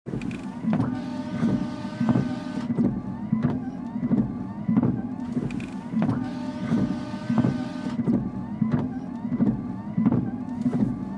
MITSUBISHI IMIEV electric car REAR WINDOW WIPERS int
electric car REAR WINDOW WIPERS
WIPERS, WINDOW, electric, REAR, car